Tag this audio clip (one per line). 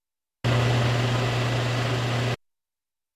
hum
air